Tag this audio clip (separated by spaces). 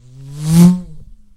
motorcycle mouth passing